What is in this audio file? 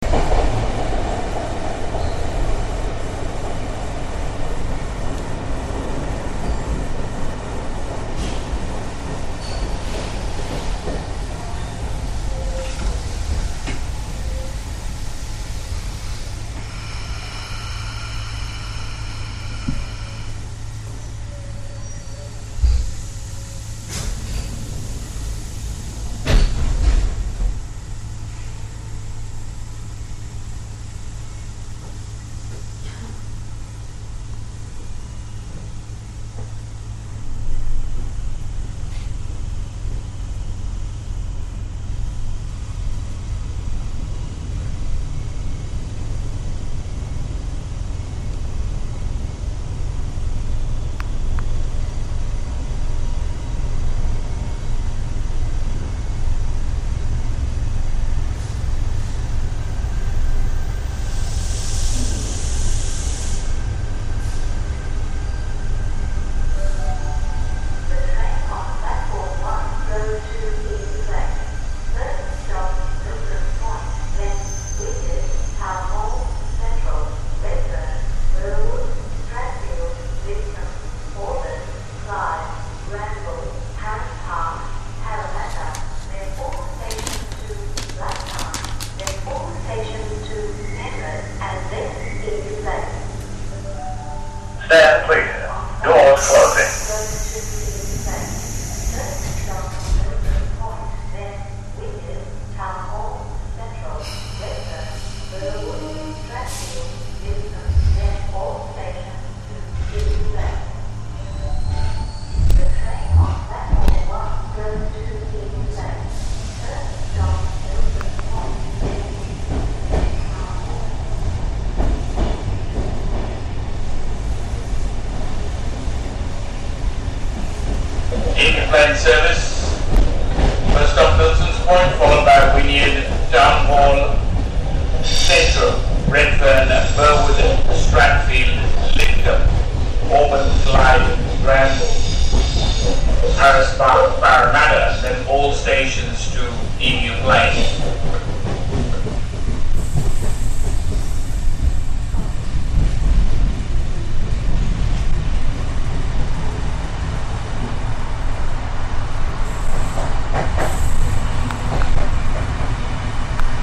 recorded on a Sydney train, heading to central station. Ambient sound of train stopping, announcement made inside and outside of train, and then the sound of train leaving station.